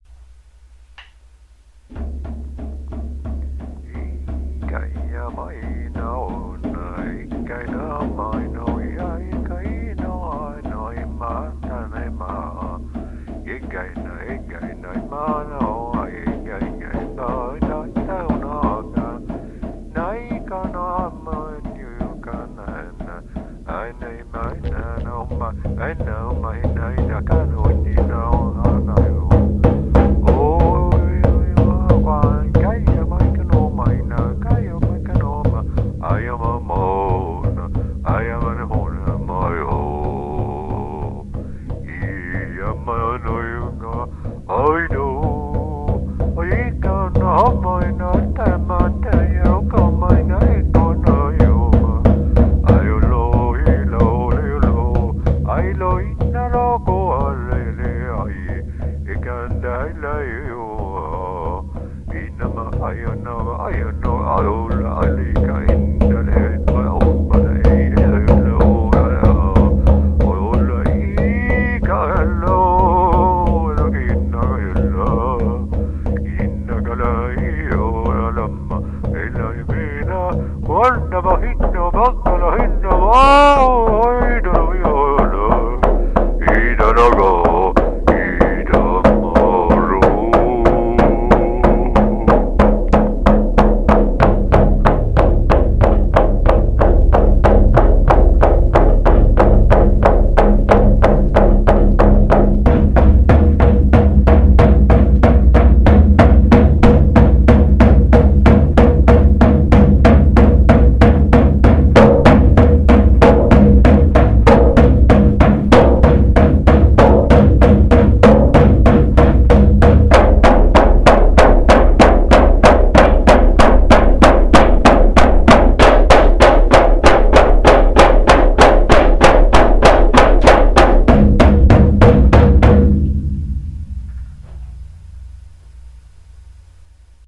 I have combined an earlier posting with a fresh recorded from today. It's a short clip to honor the natives in USA. Some people seemed to like the song 'Gaia', in which I try to transmit positive 'vibs' to some listeners. Way of comforting. The performance is not professional, and shall not be.
America, indians, natives, North, USA